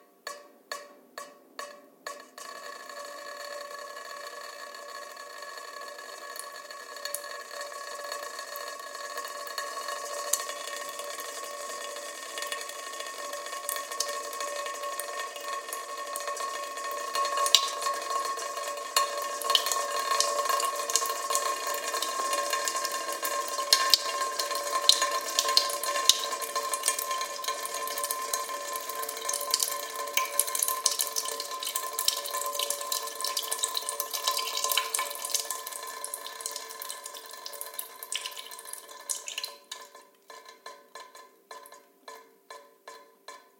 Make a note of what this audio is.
20110924 dripping.mono.06
dripping sound. Sennheiser MKH60, Shure FP24 preamp, PCM M10 recorder
rain, leak, dripping, tap, water, faucet